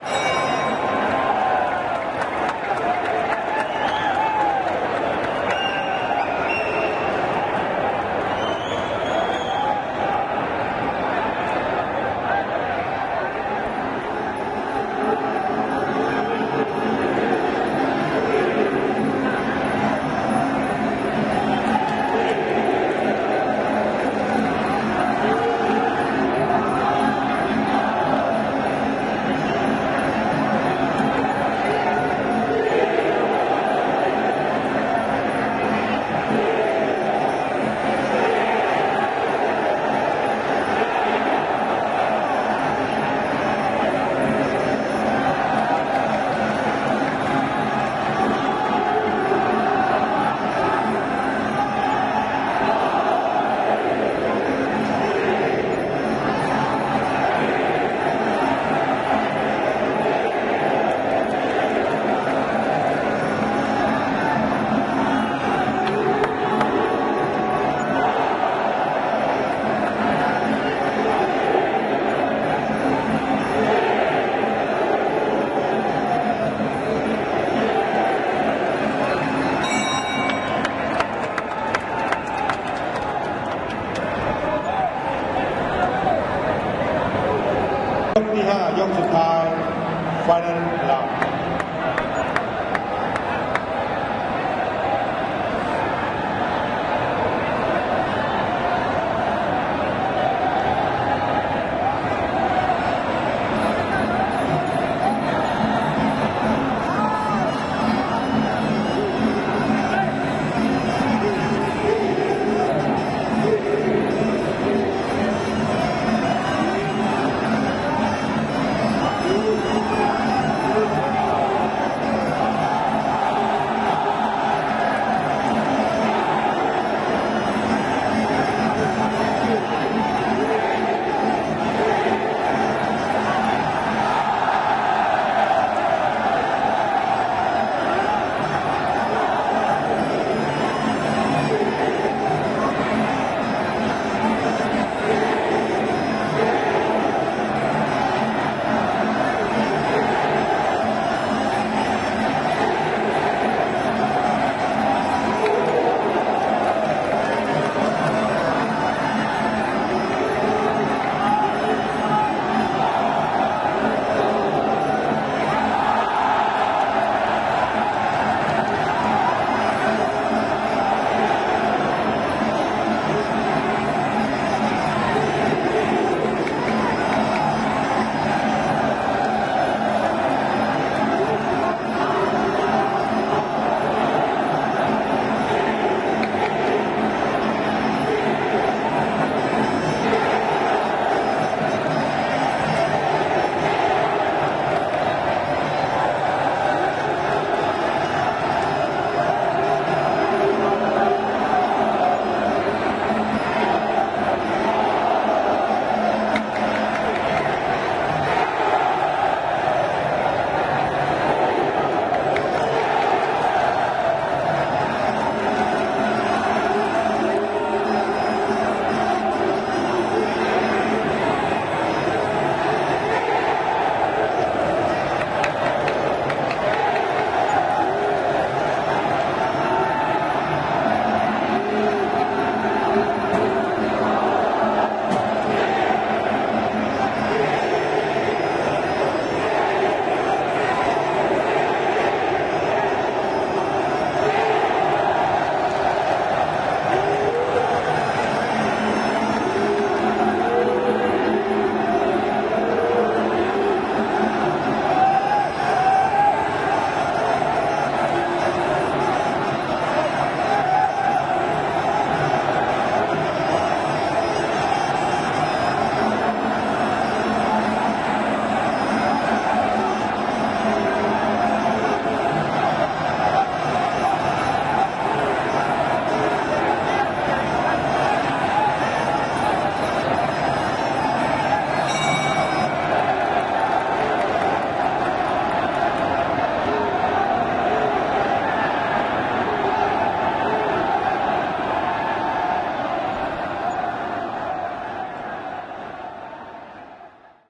Muay Thai or kickboxing, Thailand's national sport. This recording made at Ratchadamnoen Boxing Stadium in Bangkok gives a sense of the crowd's wild calls at each kick landed as well as the frenetic vocals of the placing of bets, strangely at its most intense after the third round of five.
mini-disc